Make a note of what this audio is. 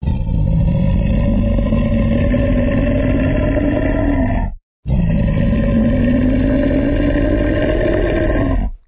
Me doing death metal growls pitched down and processed a little with a lot of compression and crisp saturation.